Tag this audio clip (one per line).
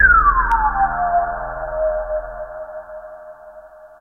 resonance
lead